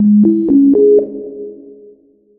A simple jingle that can be used as an announcement sound for stations or airports, inside trains or busses. Made with MuseScore2.